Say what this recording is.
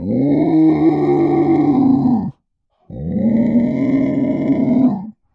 monster growl 2
animal, beast, beasts, big, creature, creatures, growl, growls, horror, monster, noises, roar, scary